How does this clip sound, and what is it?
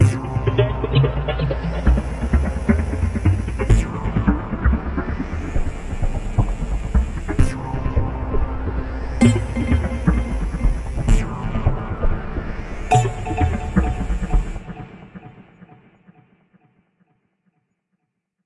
muvibeat9 130BPM
made with vst instruments